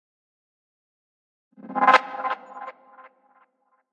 Sweep FX useful for transitions